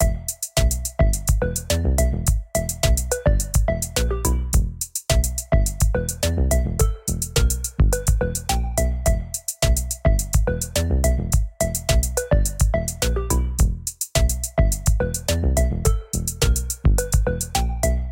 I made a beat that was good enough to be released here, but not interesting enough to make any it into a song. Or maybe it is?
Use this song however you want!